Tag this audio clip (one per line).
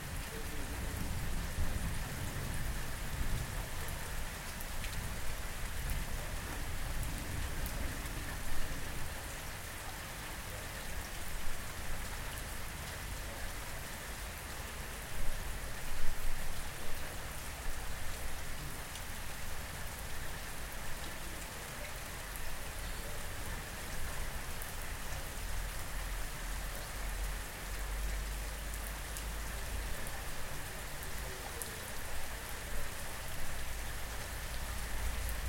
rain
rainstorm
storm
thunderstorm